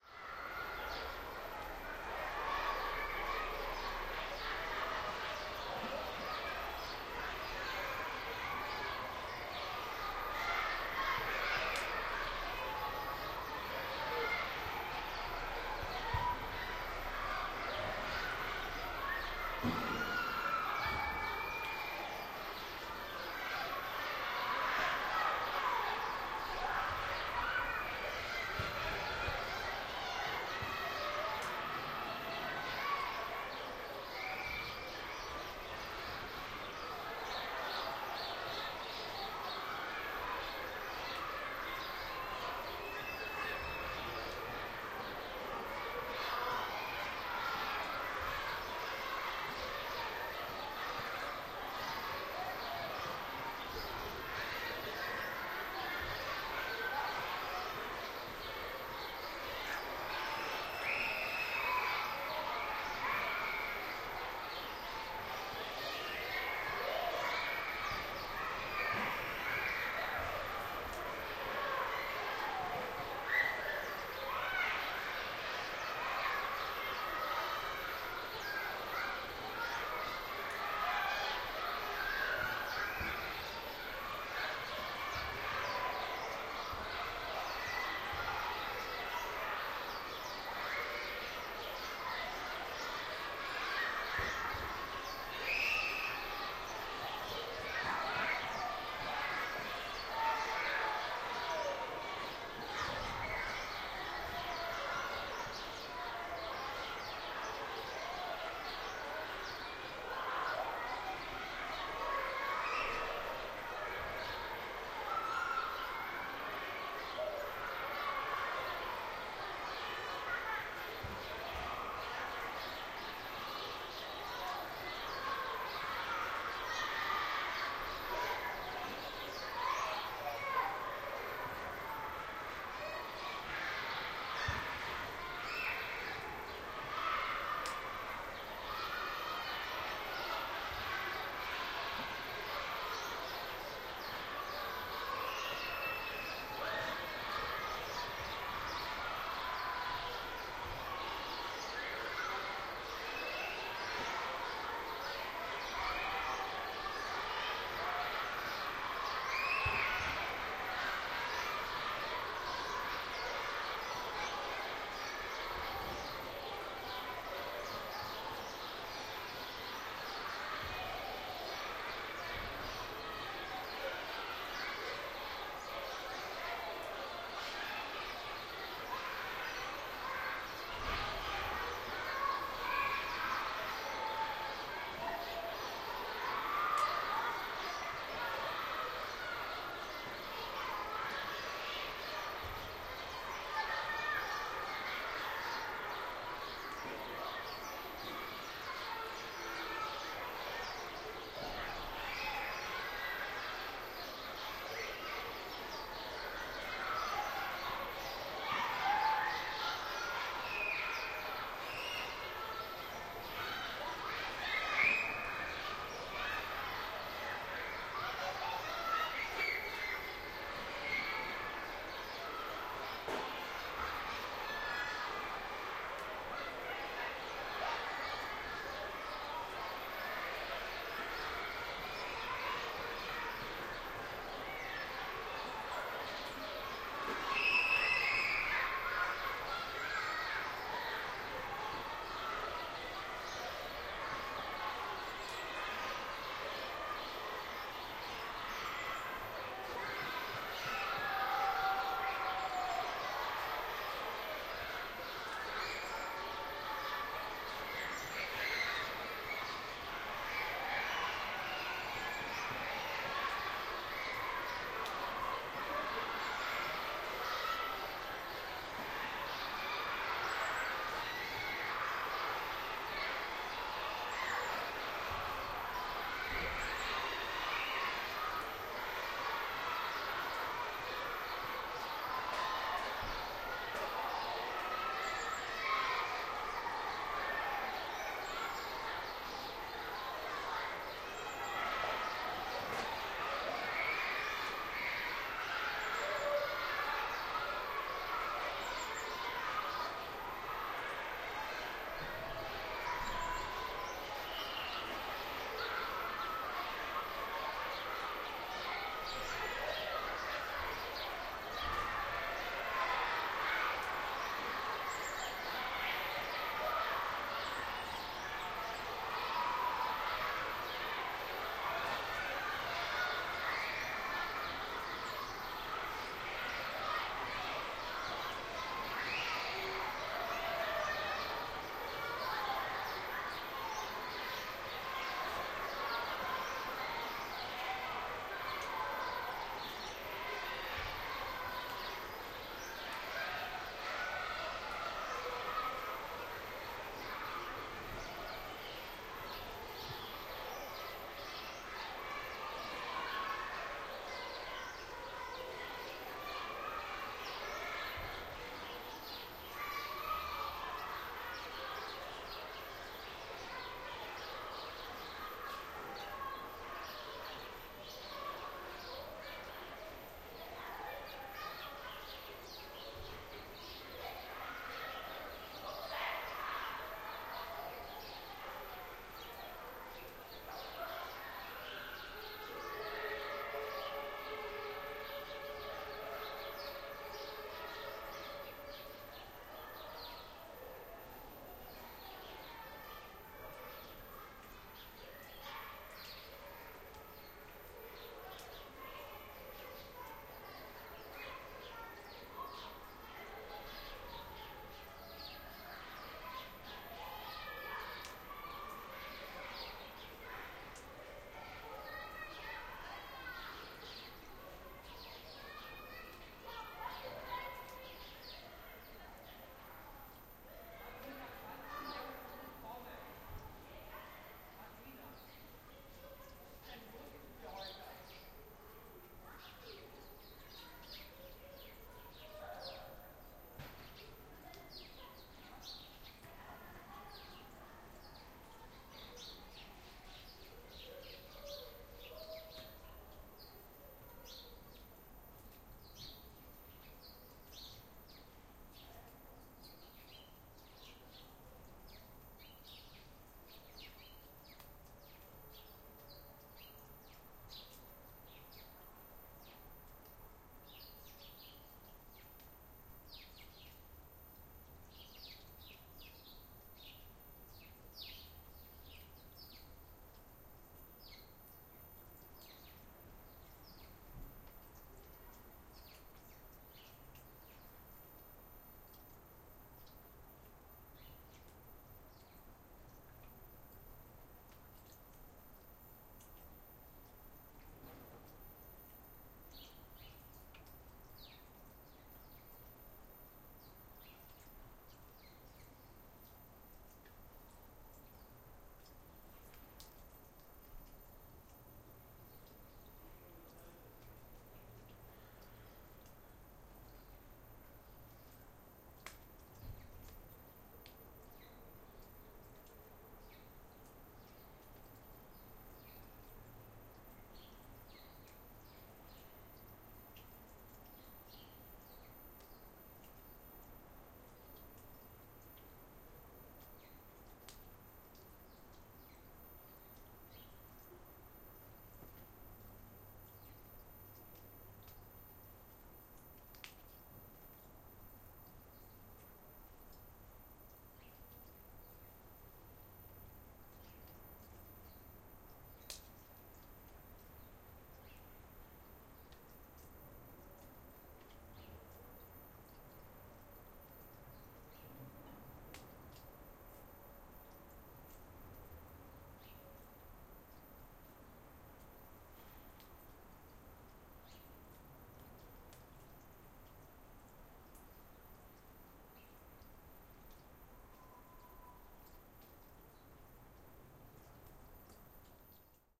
Kids playing on a school-yard
kids playing during break on a school-yard in Berlin. Recorded with a Zoom H2, stereo 48mhz 24b